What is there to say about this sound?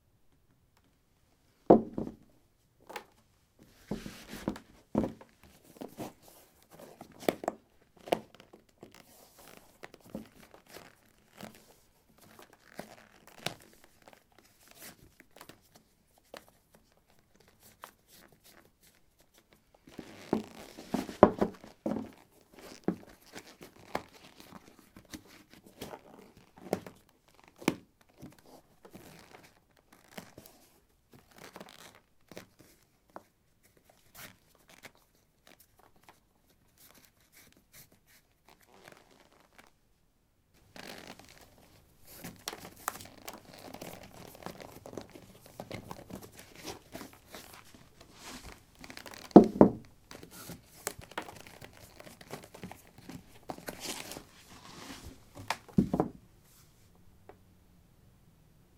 wood 18d trekkingboots onoff
Putting trekking boots on/off on a wooden floor. Recorded with a ZOOM H2 in a basement of a house: a large wooden table placed on a carpet over concrete. Normalized with Audacity.
footstep, footsteps, steps, step